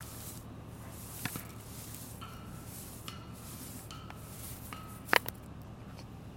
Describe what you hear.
sound of branches in the wind